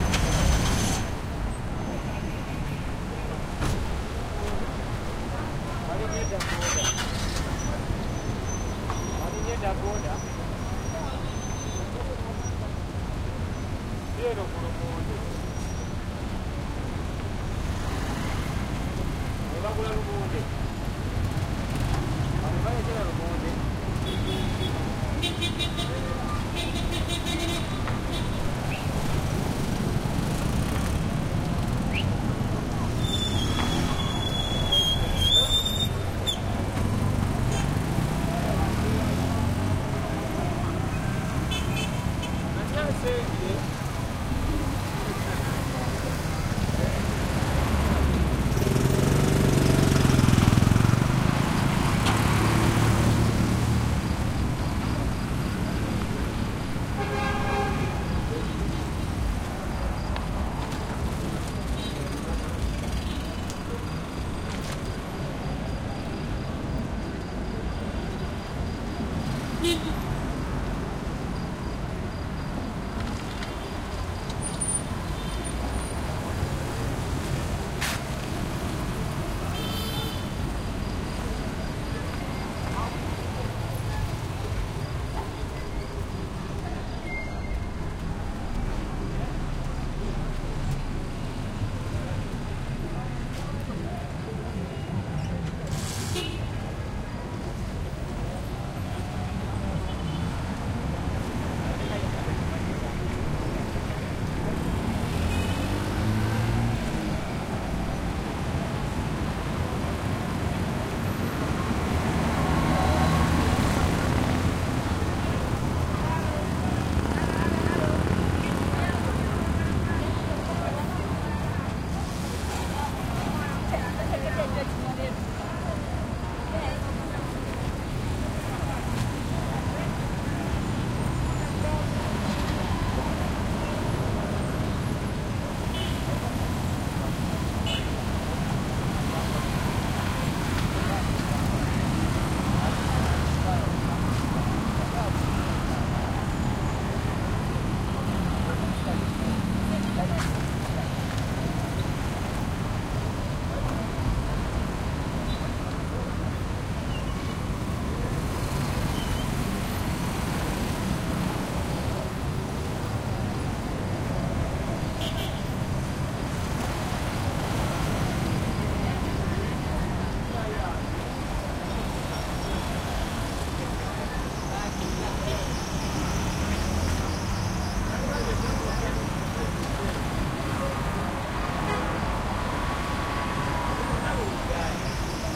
traffic medium night crickets busy intersection throaty motorcycles mopeds cars people nearby trying to be ambient but some close stuff Kampala, Uganda, Africa 2016
Africa, Uganda, busy, cars, crickets, intersection, medium, mopeds, motorcycles, night, people, throaty, traffic